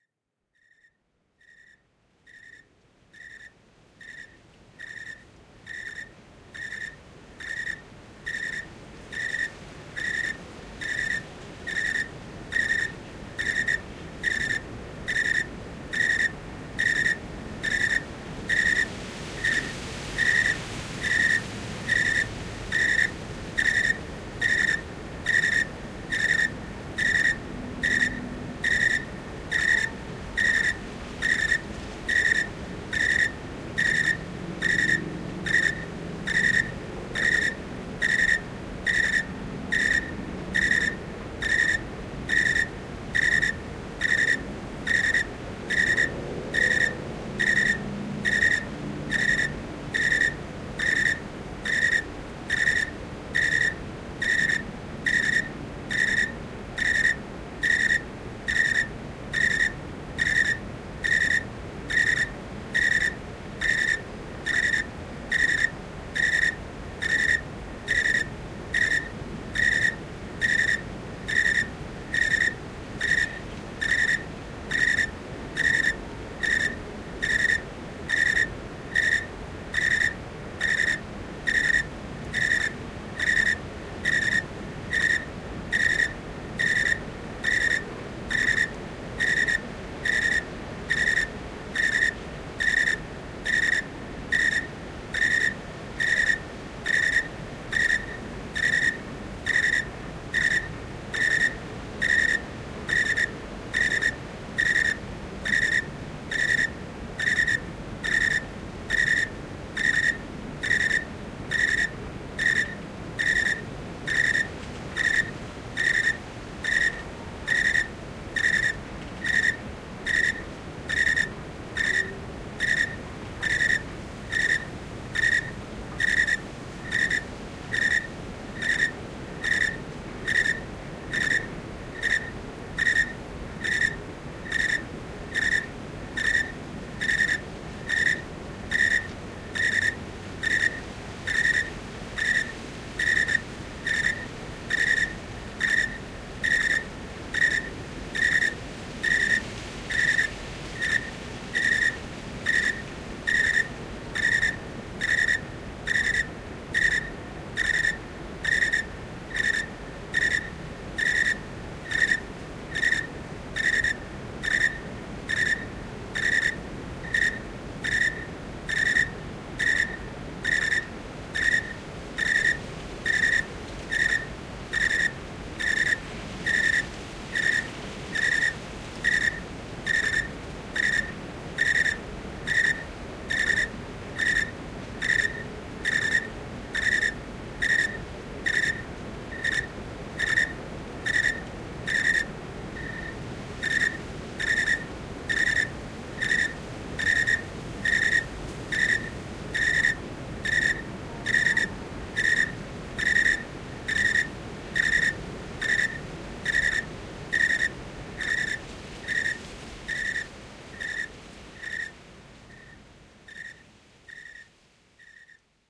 Crickets 05aug2009a
ambient, california, crickets, sherman-island